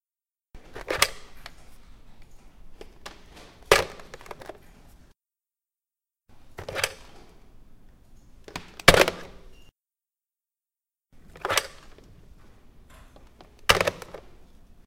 Hang up Hang down phone
This sound is produced when hang up and hang down a phone. This sound was recorded in a silent environment and the recorder was near to the source.